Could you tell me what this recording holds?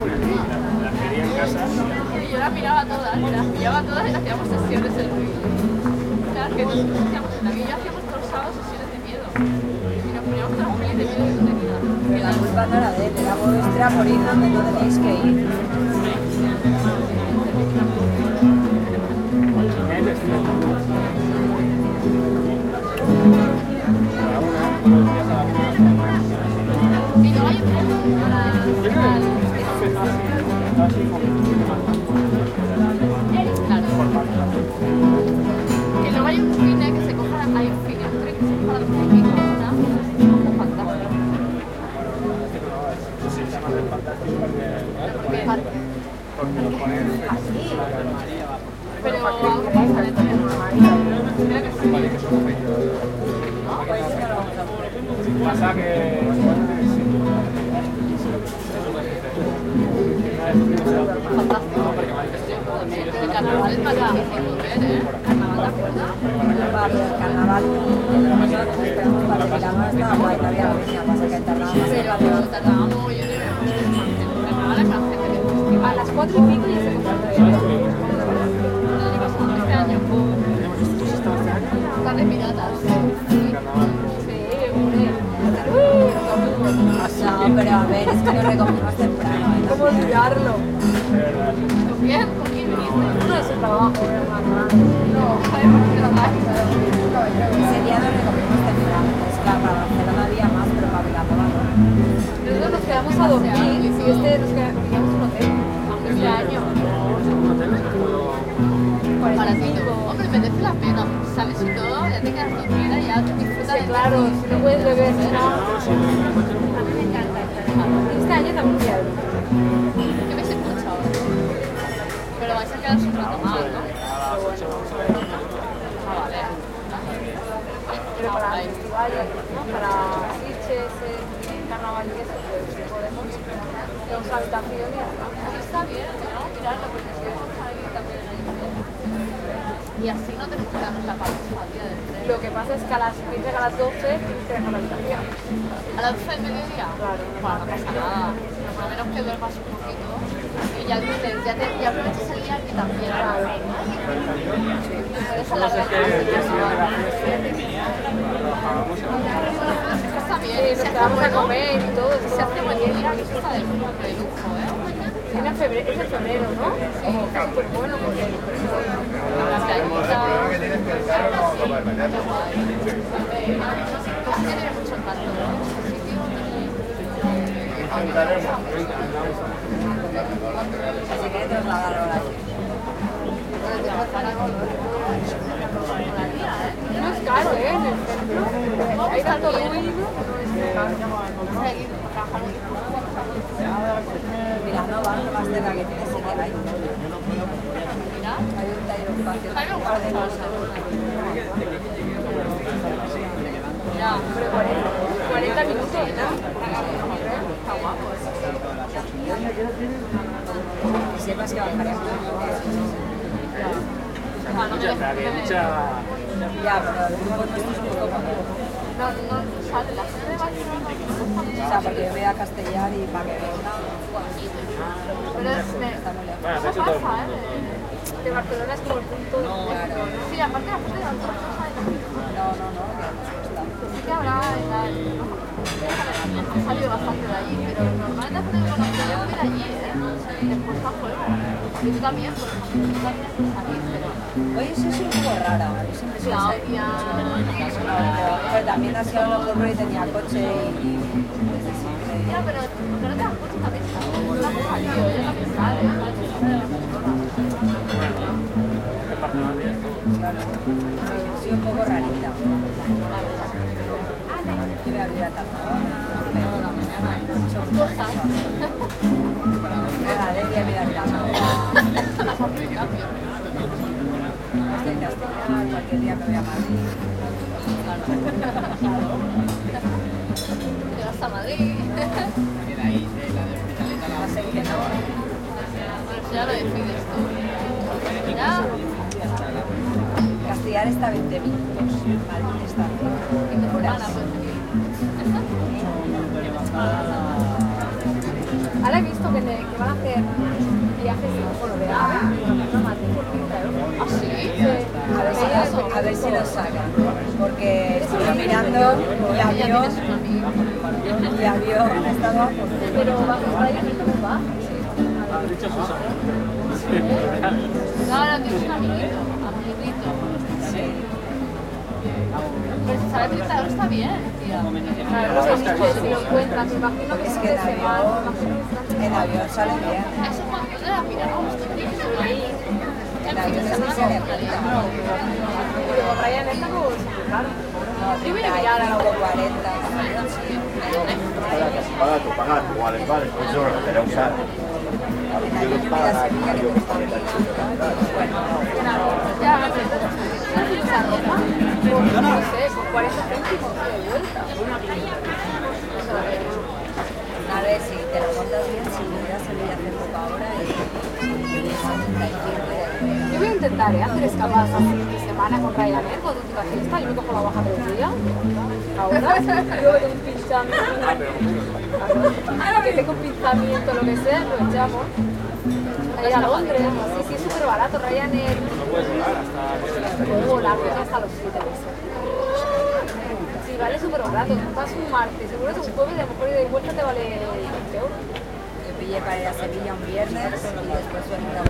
see name and tags. (tuna is a concrete type of spanish band)